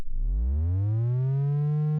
SCIAlrm 8 bit sweep low
8-bit similar sounds generated on Pro Tools from a sawtooth wave signal modulated with some plug-ins
8bit, alarm, alert, computer, robot, scifi, spaceship, synth